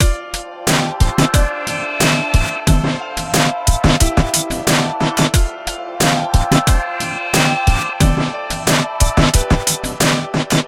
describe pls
Aciddream 10 light
A loop born in flstudio 7 which is quiet and slow (90bpm)
cut, quiet, flstudio, 90bpm, hiphop, lovely, loop, breakbeat, sweet, piano